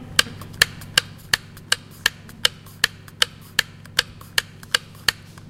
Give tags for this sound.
france
rennes
sonicsnaps

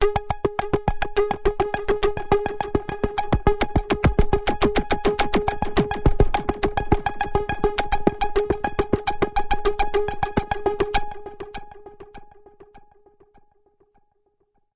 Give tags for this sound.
130bpm
arpeggio
electronic
loop
multi-sample
synth
waldorf

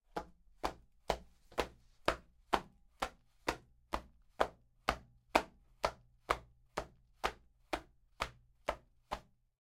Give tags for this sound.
steps
footsteps
floor

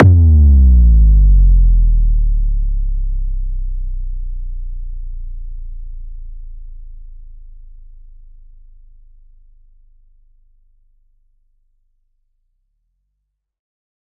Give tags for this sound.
HQ
Techno
Low-frequency
Low
EDM
Computer
Deep
Synthesizer
House
FX
effect
Pitch-Sweep
Dark
Low-Freq
FSX
Drop
Bass
Heavy
320
Pitch
Slide
Bass-Drop

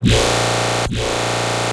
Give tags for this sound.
Sample
Evil
Growl
Dubstep
Bass
Hard

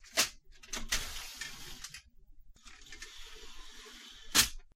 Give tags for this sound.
window
office
shutter-close
shutter-open
shutter
window-shutter

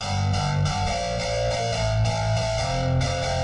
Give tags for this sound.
acid
cool
guitar
jazz
main
old
rhodes
riff
rock
school

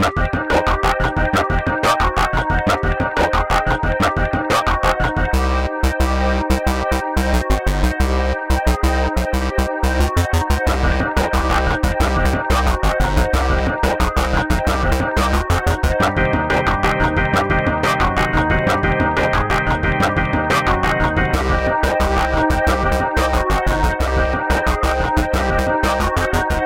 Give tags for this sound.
absurd
distant
loop
ridiculous
techno-loop

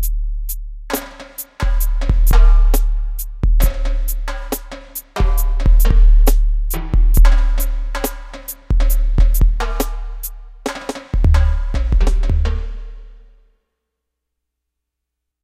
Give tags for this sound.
130-bpm
lsd
percussion-break

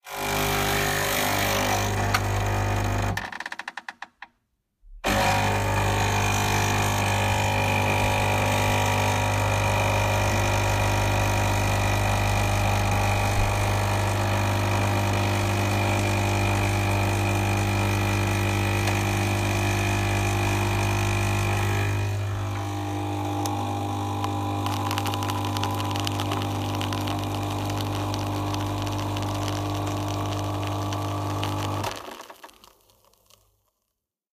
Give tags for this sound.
Serving
Fluid
Cup
Machine
Coffee

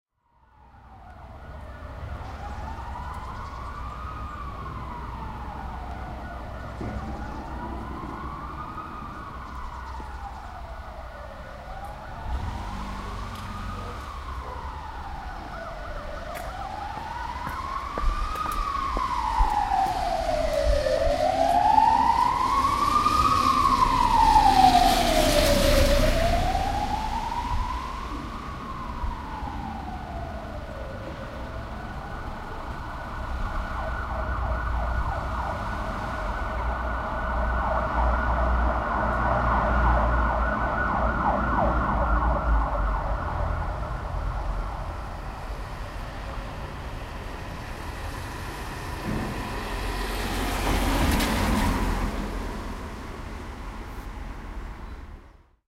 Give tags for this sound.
Fire
Sirens
Doctor
Siren
Alarm
Rush
ER
Emergency

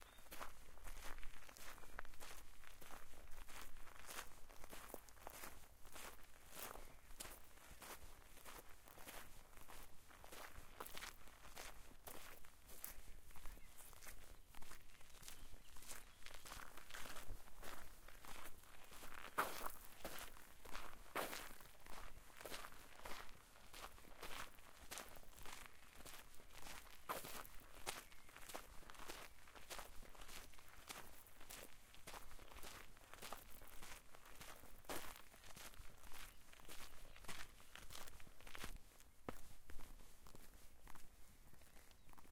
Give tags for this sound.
forest
walk
footsteps

